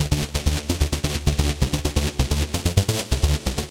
130 bass loop 1 c2
Written in free Jeskola Buzz sequencer, produced using Alchemy VSTi on DanceTrance/Loops/Lizards on Paradise. This is not a loop from the instrument, this is a sequence of stabs using the instrument itself.
130-bpm, loop, break, looper, bass, c2, acid, 130, 130bpm, bassloop